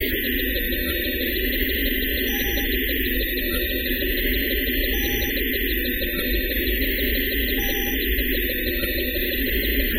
Alien operating room
alien, element, image, space, synth